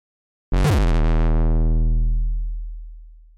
HK sawnOD Fsharp1
bass, blown-out, distorted, drum, f-sharp, hard, kick, noise, oneshot, overdriven, percussion